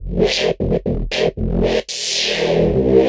175midline(mono)2
Reece Bassline Mono
Drum-and-Bass, Electronica, Dubstep